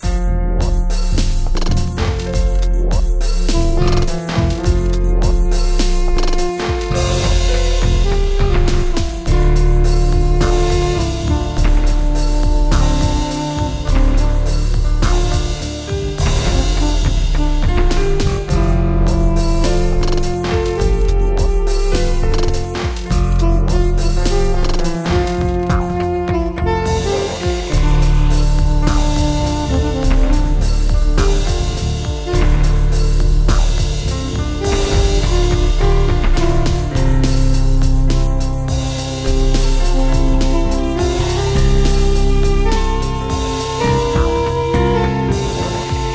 A Smooth RnB Jazz Fusion Loop cut from one of my original compositions. 104 BPM ~ Zoom R8, Ibenez Guitar, LTD Bass, Yamaha PSR463 Synth.